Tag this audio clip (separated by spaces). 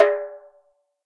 African Darabuka Djembe Doumbec drum dumbek Egyptian hand Middle-East percussion Silk-Road stereo Tombek